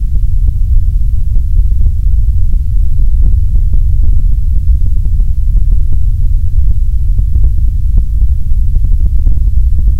Megabass Whitenoise 10sec
10 seconds of altered white noise. Giving an extremely deep bass rumble.
Using Audacity.
White noise. Leveled on heaviest setting with noise threshold at -80dB
Normalized by removing any DC offset(centered on 0.0 vertically)
Amplitude normalized to -50dB
Bass boosted twice, at frequency 200Hz and Boost 36dB
rumble, avalanche, earthquake, quake, white-noise, brown, deep, atmosphere, spaceship, distant, sound, ambience, ambient, dark, rumbling, sound-effect, effects, fx, background-sound, artificial-sound, noise, rumble-noise